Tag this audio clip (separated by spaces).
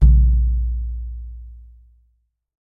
recording drumhit deep hit raw frame-drum simple perc drum-sample oneshot sample world drum low percussion